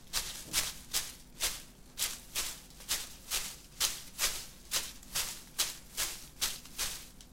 Steps on grass.